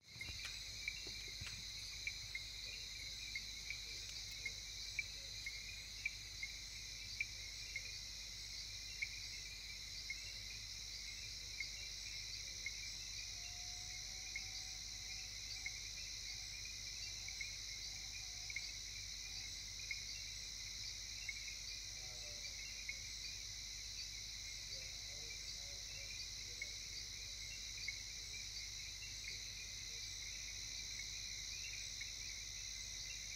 Ambient OkavangoNight01
The Okavango at night
Ambience, Ambient, Atmosphere, Birds, Country, Dusk, Environment, Frogs, Night, Wetland, Wilderness